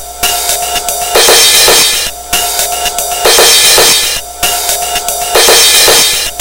Yea A Roland 505 ......
Good Intro Beats or Pitch Them Down.... Whatever....
circuit-bent, drums, glitch, hip, hop, musik